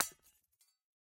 Large glass ornament smashed with a ball peen hammer. Close miked with Rode NT-5s in X-Y configuration. Trimmed, DC removed, and normalized to -6 dB.
smash, ornament